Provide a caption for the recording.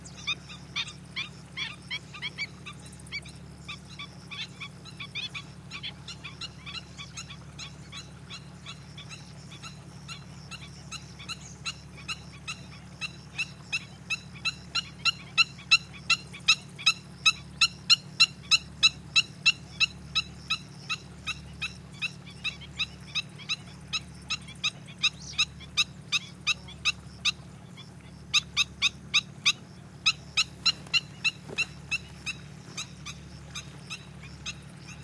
Black-winged Stilts angrily calling as they overfly me